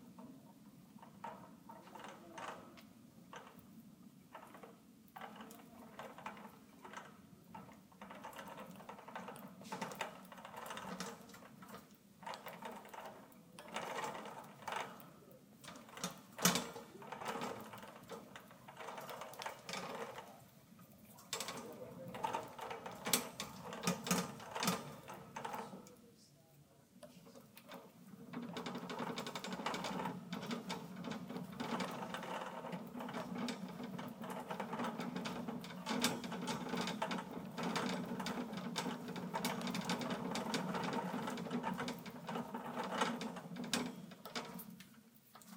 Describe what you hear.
Shopping cart - carriage, slow speed
Shopping cart basket being pushed at slow speed